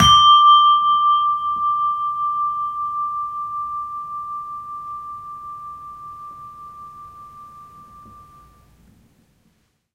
Medieval bell set built by Nemky & Metzler in Germany. In the middle ages the bells played with a hammer were called a cymbala.
Recorded with Zoom H2.